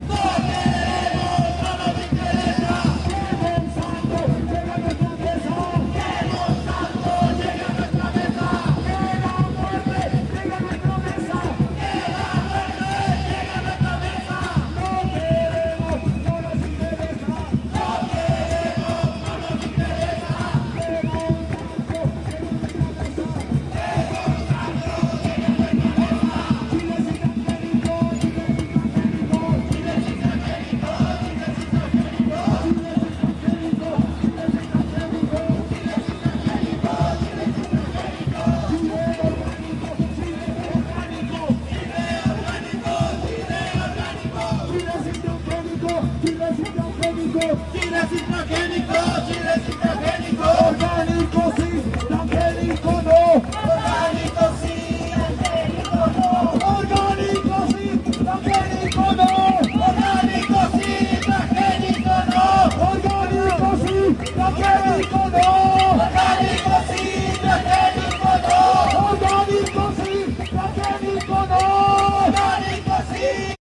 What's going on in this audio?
Junio del 2011. Varios cánticos en contra del gigante de las semillas transgénicas. Conversaciones, intrumentos de percusión,
aplausos, silbatos.
no queremos, no nos interesa que monsanto llegue a nuestra mesa
chile sin transgenicos
chile organico
organico si, transgenico no